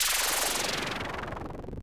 Short sound effects made with Minikorg 700s + Kenton MIDI to CV converter